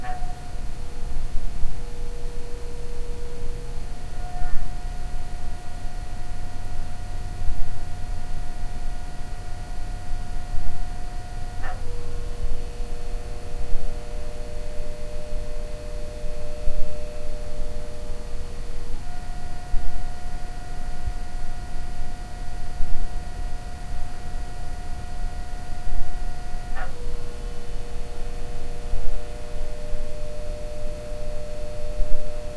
Oil-filled radiator motor running very quietly.